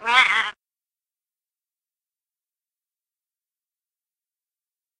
Siamese cat meow 2